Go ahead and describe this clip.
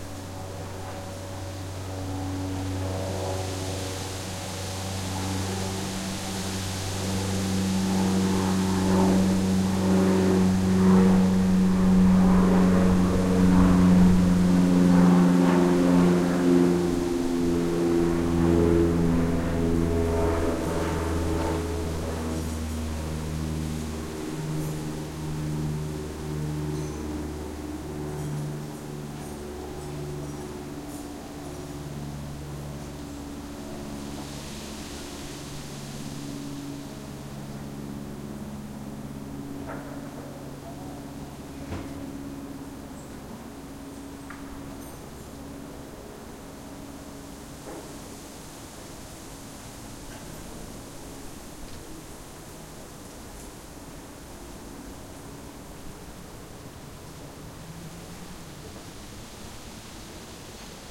plane and wind
Short and snappy. A plane flying above some poplar trees. Olympus LS 10 recorder and EM172 microphones.
I just did this recording to try out the combination of that gear.
summer, plane, field-recording